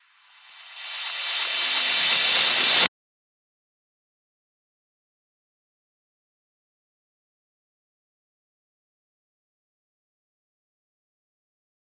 Rev Cymb 29
Reverse cymbals
Digital Zero
cymbals, metal, reverse